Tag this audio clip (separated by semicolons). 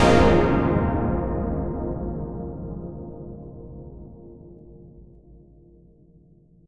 reverb; reverb-tail; soft; synth; chord; stab